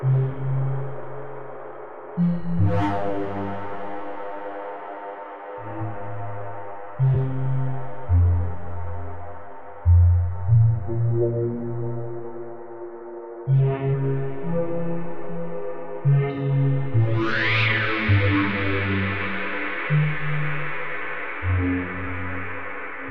ambient 0001 1-Audio-Bunt 3
DNB, NoizDumpster, VST, ambient, bunt, digital, drill, electronic, lesson, lo-fi, loop, noise, space, square-wave, synth-percussion, synthesized, tracker